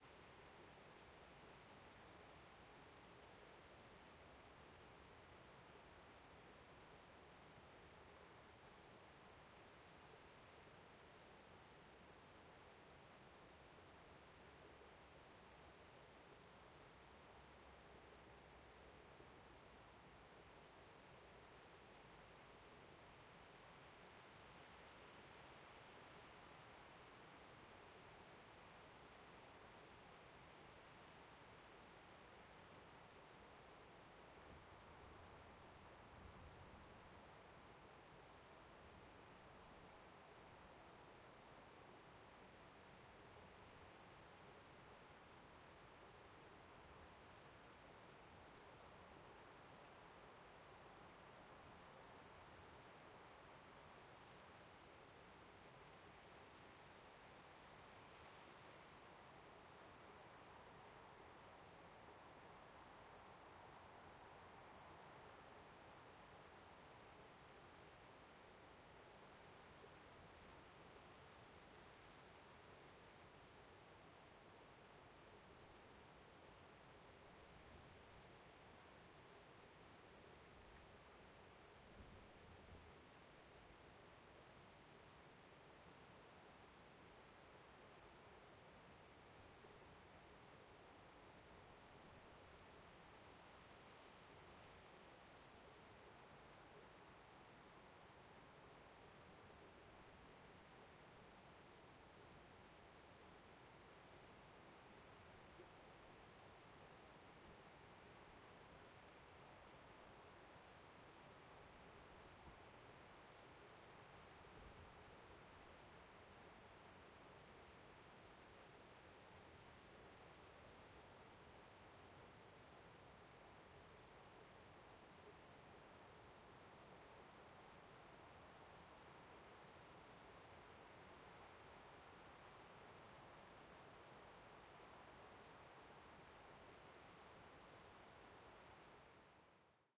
Outdoor Ambience - - Stereo Out
Subtle and general external atmosphere
Ambience, ambient, atmos, atmosphere, field-recording, outdoors, outside, Tone